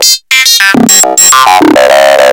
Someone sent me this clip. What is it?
Some selfmade synth acid loops from the AN1-X Synthesizer of Yamaha. I used FM synthese for the creation of the loops.
acid, an1-x, sequence, synthesizer, yamaha